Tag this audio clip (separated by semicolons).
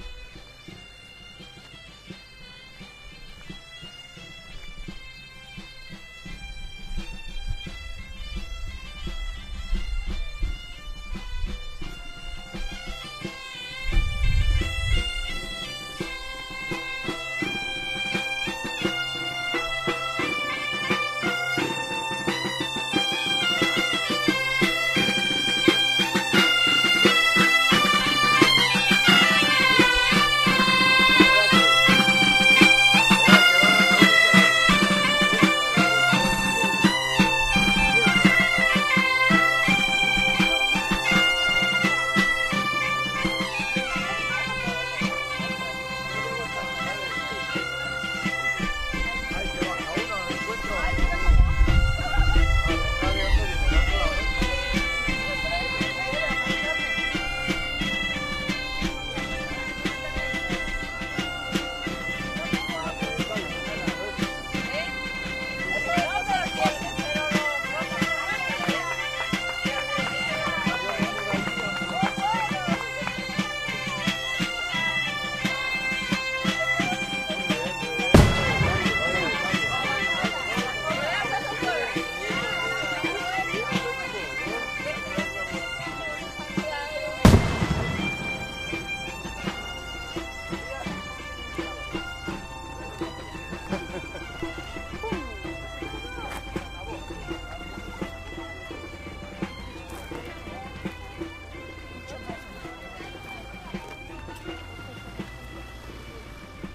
celebration; gaitas; street; festive; bagpipes